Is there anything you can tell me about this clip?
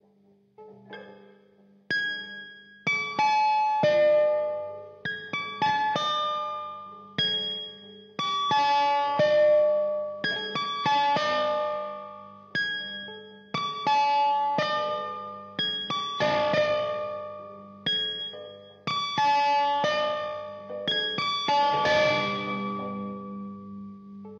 Atonal Electric Guitar Riff
Hitting the strings with metallic mallets which that makes the guitar sound more sharp and percussive. Suitable for 20th century music, Hip Hop, Downtempo, Expirimental etc. Running at 90bpm
89, 90bpm, atonal, Downtempo, dr05, emag, Expirimental, Hip, Hop, marshall, se, ss, tascam, tonelab, vox